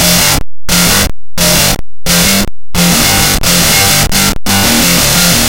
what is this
either DVS Digital guitar VSTi paired with a buncha VST's or the SLaYer VSTi.
a, but, death, guitar, guitars, heavy, like, metal, pc, processing, shredding